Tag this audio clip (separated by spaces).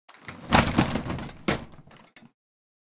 close foley slide-door